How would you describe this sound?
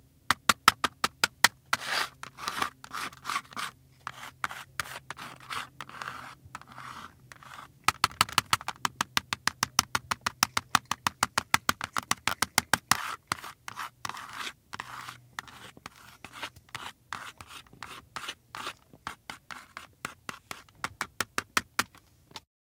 cutting up line
line, cutting, up